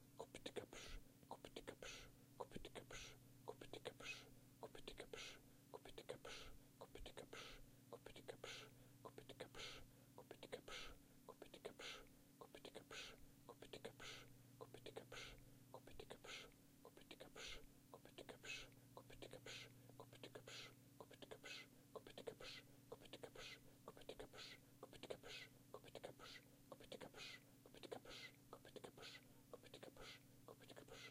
simple one cylinder steam engine, sound made by mouth.
artificial, diesel, machines, synthetic, sounds, steam